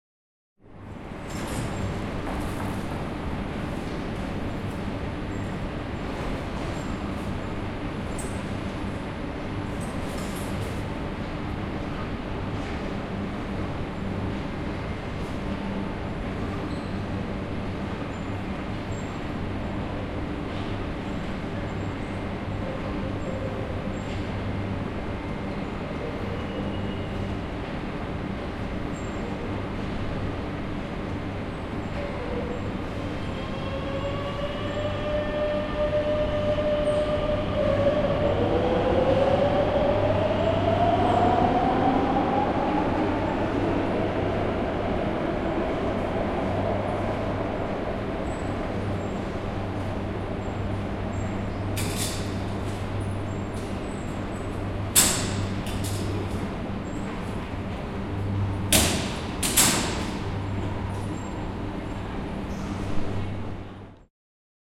Metro Madrid Room Tone Llegada Distante Barrera Salida